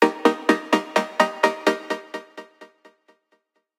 Pluck chords 127 BPM
This is a pluck loop created using Access Virus C and third partie effects.
Dance, Music, 127-BPM, Electronic, Chords, Sample, EDM, Loop, Pluck